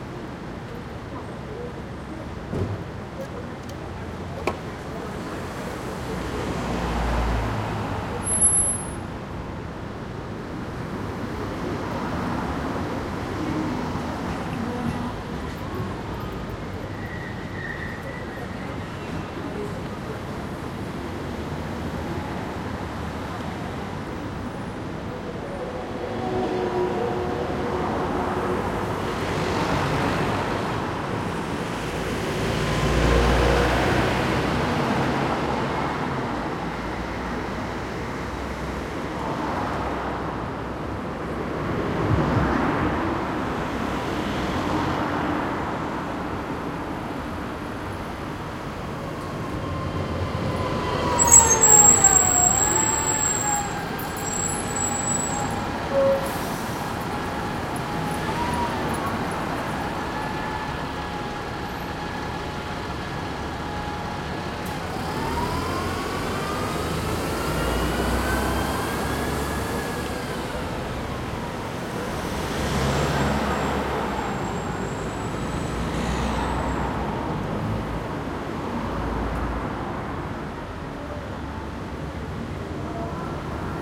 140802 Greenwich StreetNoon F
4ch surround recording made at a bus stop in Greenwich/England at noontime on a weekday. The recorder's front mics, featured in this file, are facing into the street at a right angle at a height of approx. 60 cm. Traffic is passing in both directions, about 1 min. into the recording, a bus stops and continues onward.
Recording was conducted with a Zoom H2.
These are the FRONT channels of a 4ch surround recording, mics set to 90° dispersion.
busy, field-recording, traffic, road, passing